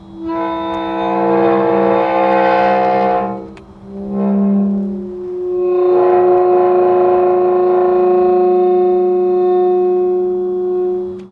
Heavy wrought-iron cemetery gate opening. Short sample of the groaning sound of the hinges as the gate is moved. Field recording which has been processed (trimmed and normalized). There is some background noise.